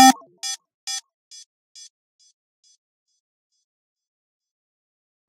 This is a beepy synth held for about a 1/32 or a 1/16 note. The note is c3 for reference.